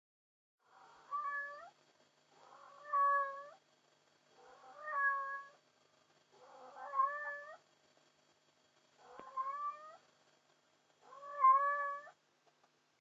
Recording kittles
Female cat calling for attention.